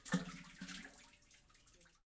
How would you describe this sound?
Dropping stone in well 2
Dropping stone in well